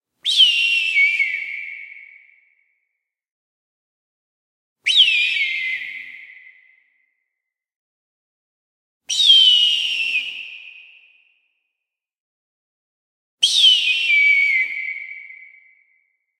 RAM Mouth Hawk rev v1
Four versions of a distant hawk cry recreated by way of a whistling technique. Recorded in a car during tech using a Tascam DR40 and edited in Logic.